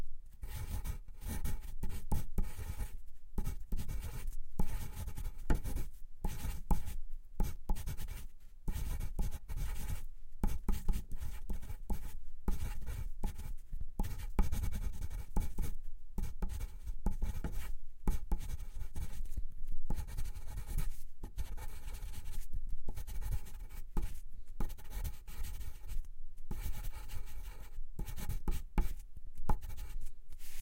Pencil on Paper on Wood Lines and Shapes 1
Recorded on an SD 702 with an SM81 and a cheap akg SDC can't remember which one just wanted variety. Not intended as a stereo recording just 2 mic options.
No EQ not low end roll off so it has a rich low end that you can tame to taste.
draw, line, paper, pencil, scribble, write, writing